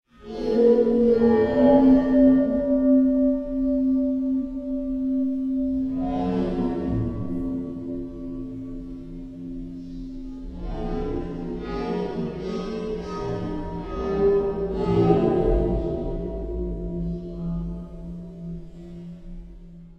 Stretched Metal Rub 11
A time-stretched sample of a nickel shower grate resonating by being rubbed with a wet finger. Originally recorded with a Zoom H2 using the internal mics.
time-stretched, metal, nickel, resonance, fx, processed, abstract, rubbed